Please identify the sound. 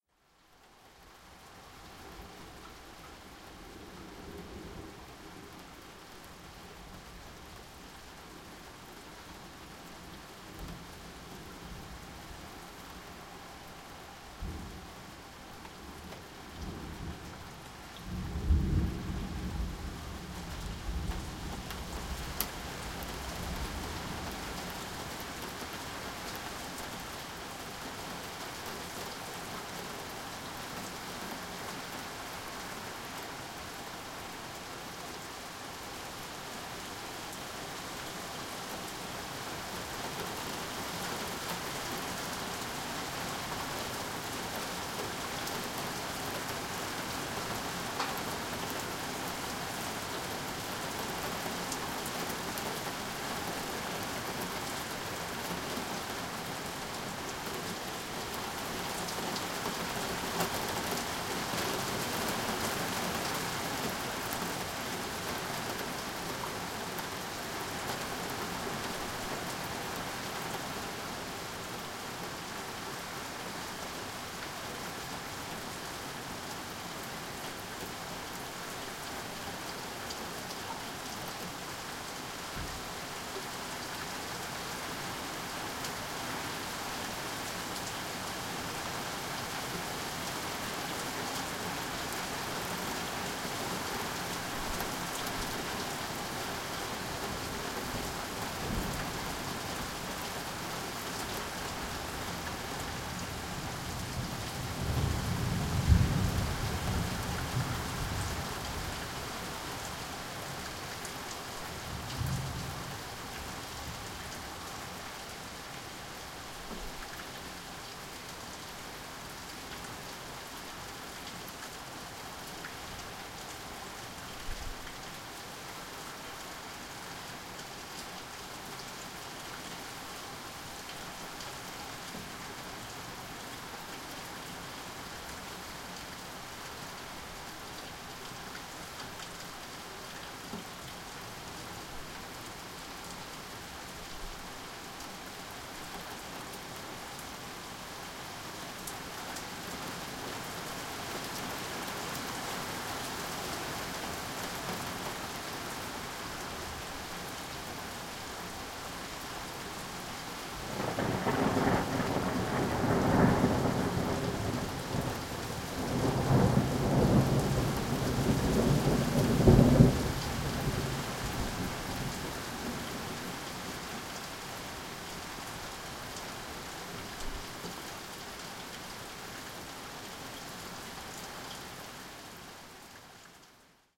Thunder rain getting heavier
Recorded during a thunderstorm with Zoom H2
rain, storm, Thunder, weather